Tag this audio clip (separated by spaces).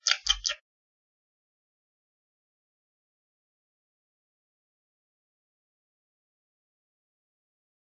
birsds nature ambience south-spain field-recording